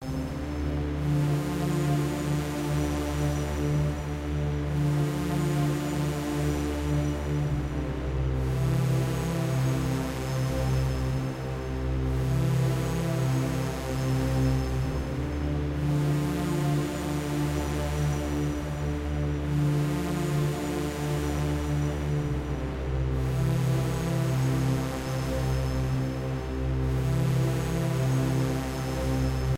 The journey
cool sounding atmospheric loop. sounds kind of futuristic in a way i think
atmosphere, loop